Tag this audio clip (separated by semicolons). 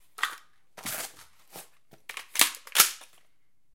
Rifle; Firearm; Magazine; AR-15; Reload; Gun